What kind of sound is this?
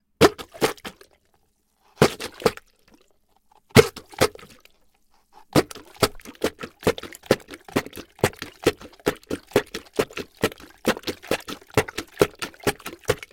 Sound of plunger squelching water.